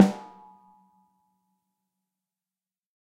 PDP BIRCH 14x5 AMBIENT VELO2
The samples are at different velocities from 1 to 9. The drum was recorded with two close mics, a Josephson E22 and a Shure SM57 through NPNG and Neve preamps respectively, as well at stereo overheads (two Lawson FET47s through NPNG), stereo room mics (two Neumann TLM103s through Millennia Media) and a whole bunch of miscellaneous mics down the corridors with the live room door open. These were combined into stereo samples. The source was recorded into Pro Tools through Frontier Design Group and Digidesign converters. Final processing and editing was carried out in Cool Edit Pro.